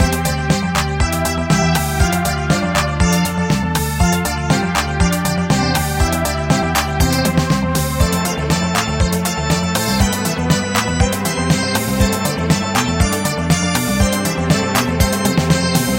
Short loops 12 03 2015 1
made in ableton live 9 lite
- vst plugins : Alchemy, Strings, Sonatina Choir 1&2, Organ9p, Microorg - Many are free VST Instruments from vstplanet !
you may also alter/reverse/adjust whatever in any editor
gameloop game music loop games organ sound melody tune synth happy
gameloop, organ, synth